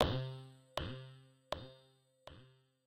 Good day. This atmosphere, texture sound make by Synth1. Hope - you enjoy/helpful
effects, sfx, sound-design, fx, sounddesign, gameaudio, gamesound